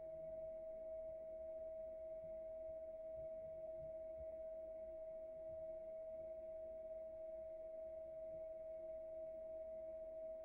SFX Ambiance: Electrical Hum
Low electrical hum